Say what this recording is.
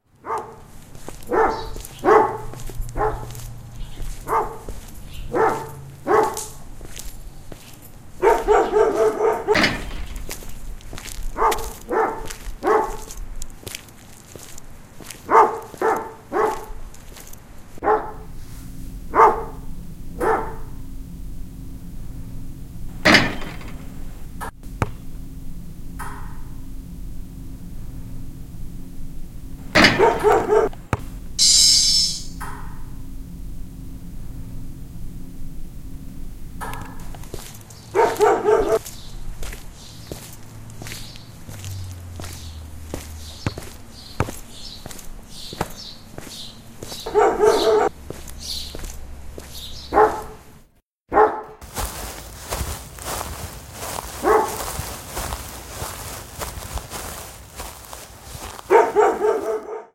Soundwalk Soundscape
dog, field, recording, scape, sound, walk